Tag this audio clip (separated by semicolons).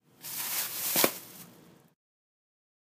Bag; Effect; Foley